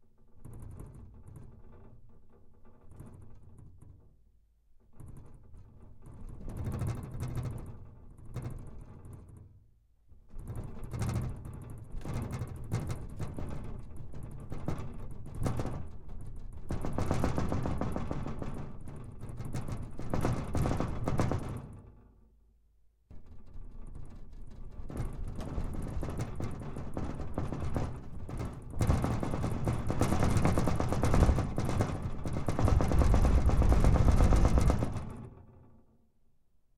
Earthquake with noisy glass and windows
window, jordb, glass, vning